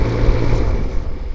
heater shutdown
An electrical resistance heater (space heater) as it is turned off. The heater is believed to an Arvin Heatsream 1000.
Recorded directly into an AC'97 Soundcard by a generic microphone.
noise, unprocessed, household